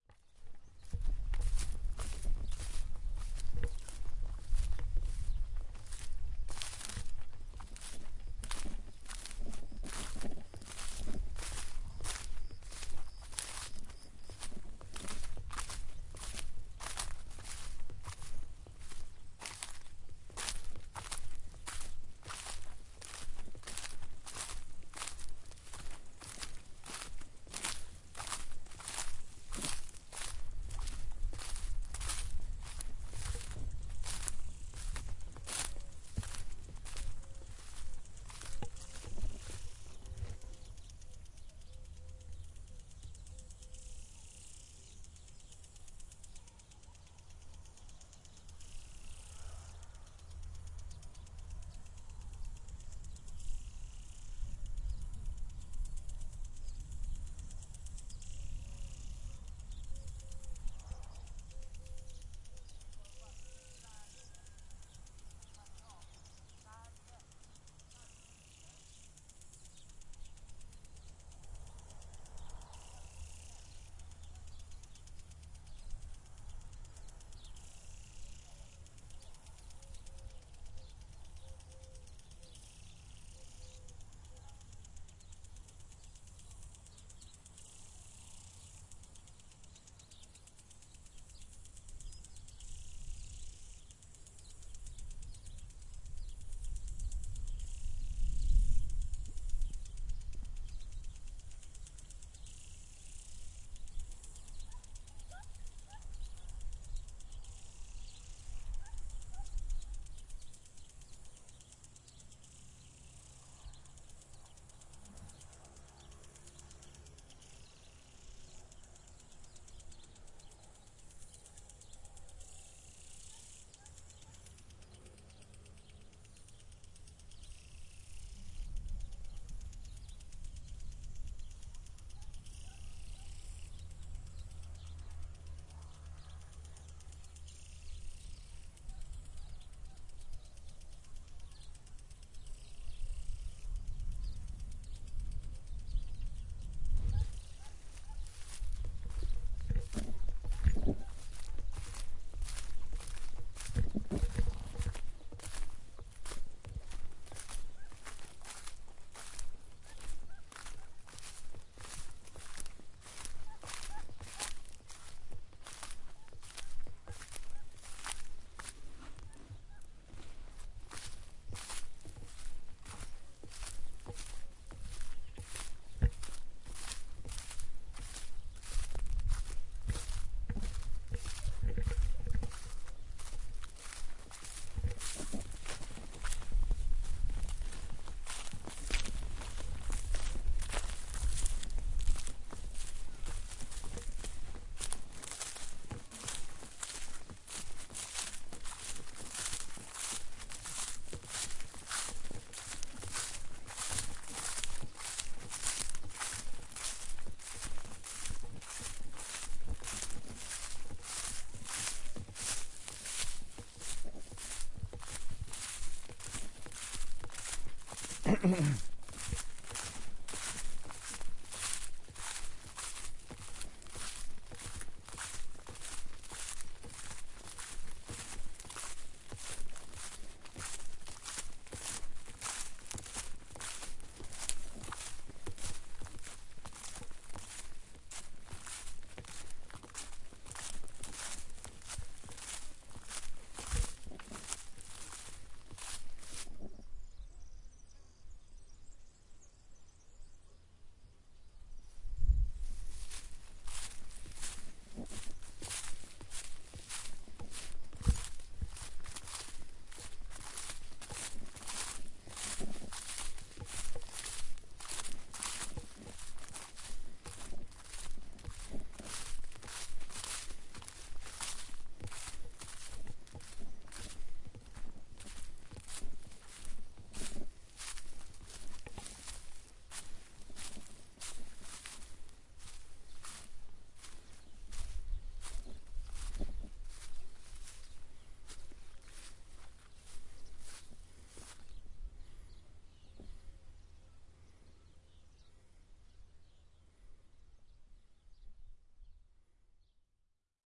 Walk in Samalús Part 2
Field-recording of a small walk in Samalús (Catalunya, see the geotag).
The recording was made with a Zoom H4n.
ambience, birds, field-recording, footsteps, nature, samalus, sprinkler